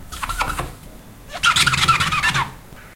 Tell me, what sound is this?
Glass washing
window, glass